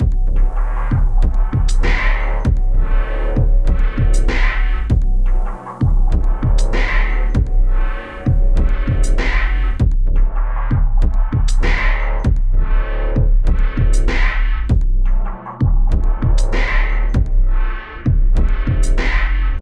Abstract Glitch Effects 012
Abstract Glitch Effects / Made with Audacity and FL Studio 11
Abstract, Design, Effects, Electric, Glitch, Random, Sci-fi, Sound, Sound-Design, Weird